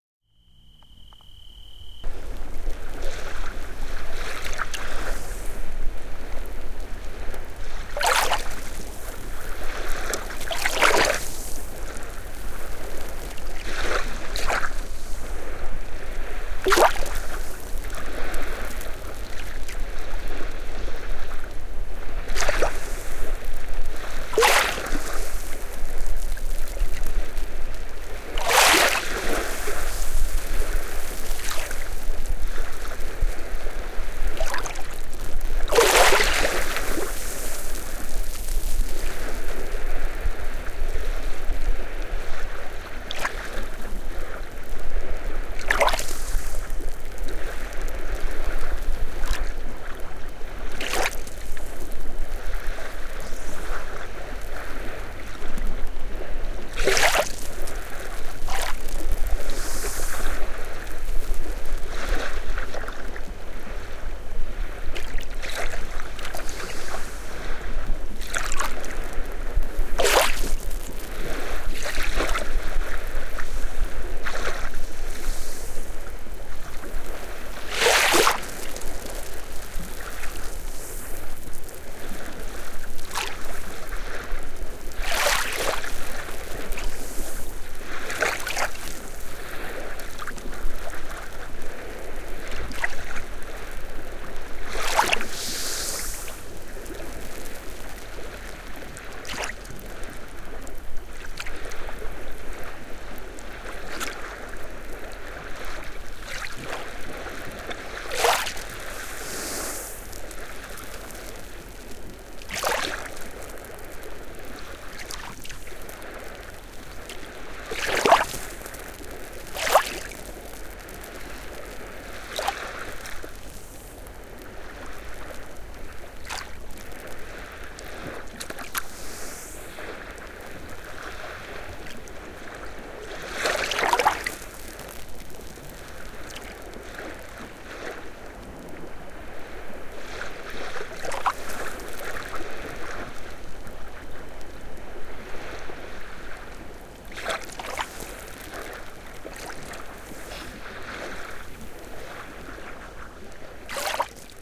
Meer Sand sanft Bläschen Sard.TB
recorded with a 2 x Micrtech Gefell M296 omnis in AB on a DAT. This is a 10 jear old recording;-)
Very gentle waves on the sand of the beach. you ca hear the bubbles of the salty foam bursting.
beach, water